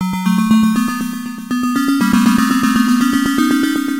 Ambient sound and such and such .....: D Dull and dark notes of synthesizer.